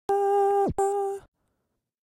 Female Vocal Glitch 2
Short samples, vocal with effect
effect, female-vocal, glitch, voice